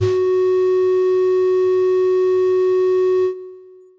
White Pipes Fs4
Inspired by the Synth Secrets article "Synthesizing Pan Pipes" from Sound on Sound, I created this. It's completely unrealistic, I know. This is the note F sharp in octave 4. (Created with AudioSauna.)
panpipes, pipes, wind